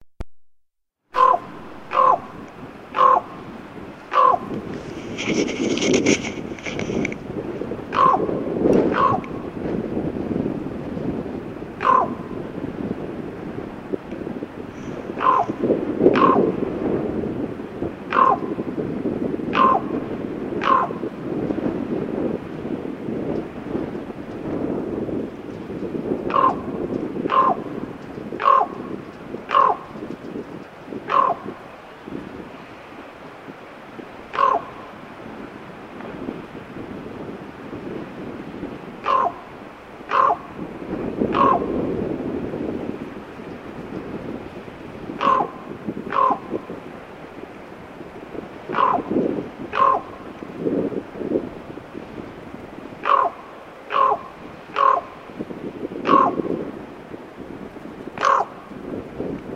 Raven in Teller, AK
A raven calls from on top of a telephone pole in Teller, AK. Recorded with a shotgun mic on a Marantz Flash Recorder.
ak, bird, field-recording, wildlife, teller, alaska, bird-call, raven